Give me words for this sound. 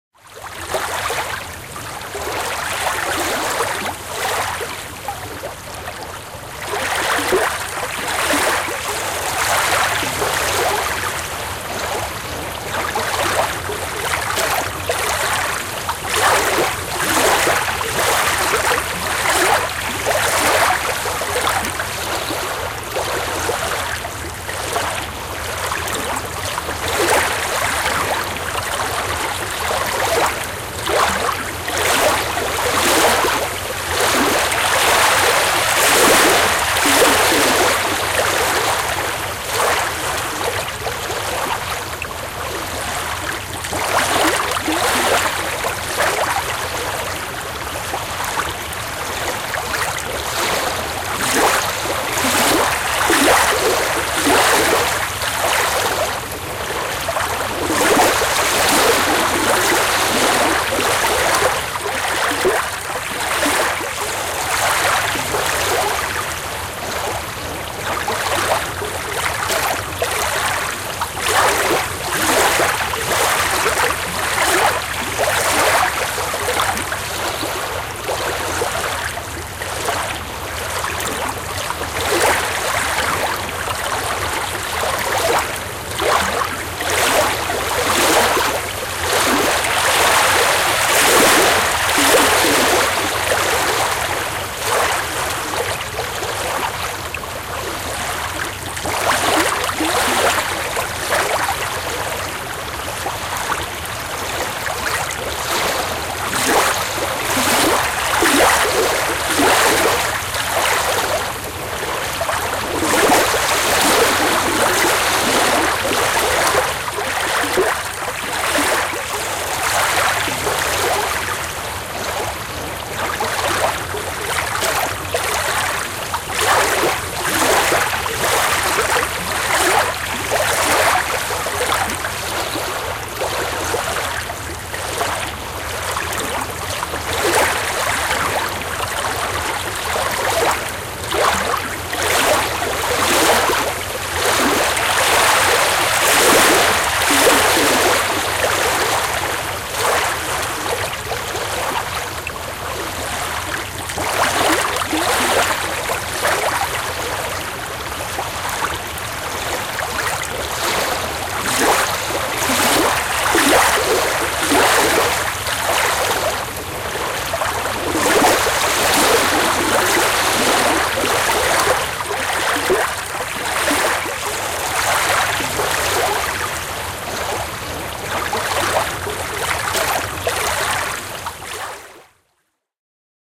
Pienet aallot hiekkarantaan, liplatus / Small waves on the beach, lapping
Järven pienet aallot liplattavat rannassa lähellä.
Paikka/Place: Suomi / Finland / Hiidenvesi
Aika/Date: 21.09.1993